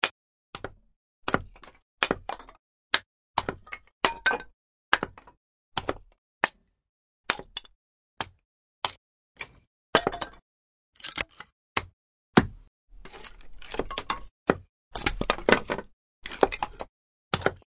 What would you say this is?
Chopping small wood pieces
Me chopping small wooden pieces. Recorded with Tascam DR-05